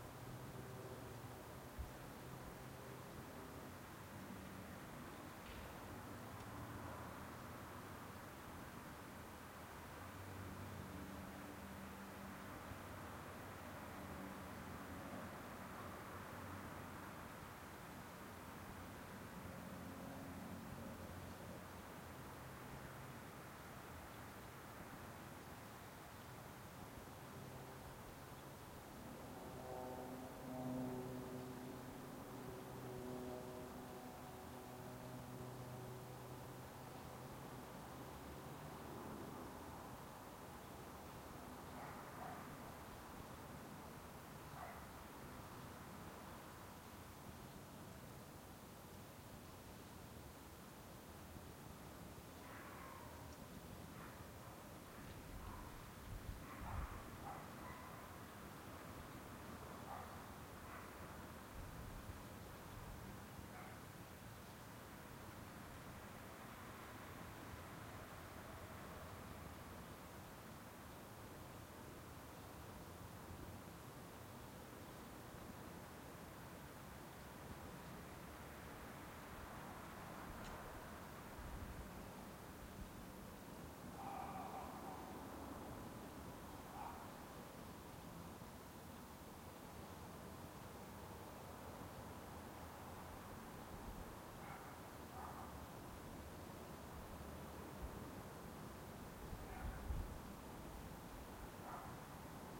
A21 Night distant dog
Night time atmosphere recorded near Biggin Hill in Kent, England. I used a Sony stereo condenser microphone and recorded onto a Sony Mini disc. The A21 to Hastings is 200 metres away.
field-recording, atmosphere, ambience